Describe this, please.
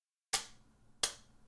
#5 Tin Scratch
swish, cut, Tin, blade, Metal, Scratch